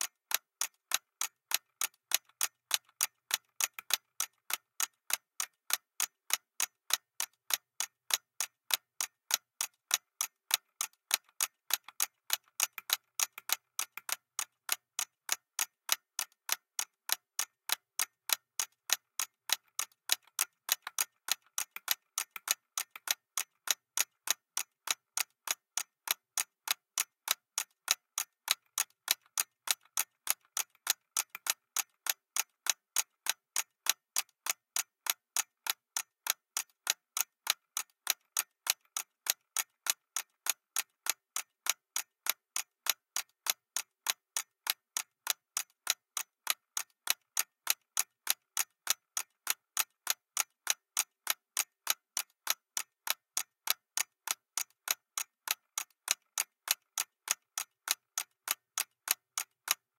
delphis CLOCK FX LOOP 4
clock record in a plastic box
bpm100, clock, delphis, fx, loop, tick, ticking, time